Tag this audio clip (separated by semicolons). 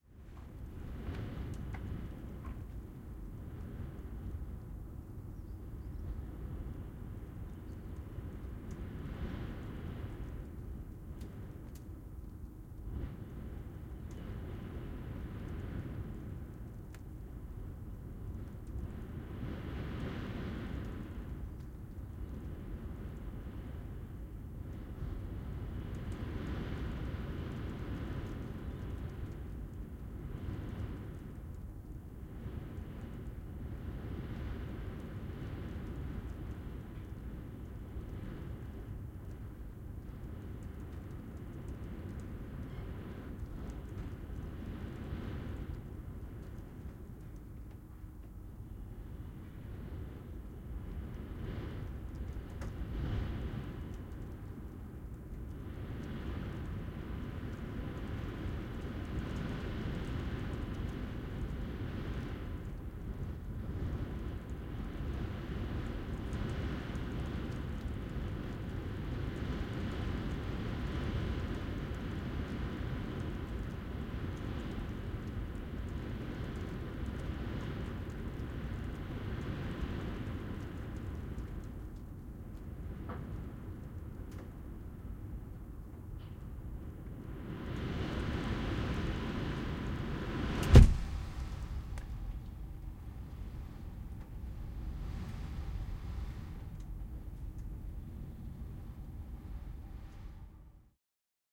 in-open-window; no-aircon; empty; big-gust; office; distant-exterior-world; wind-whistle; interior; high-winds; EDITED; ATMOS; day; window-blows-shut; exterior-birds; blind-rattling-gently; building-structural-moves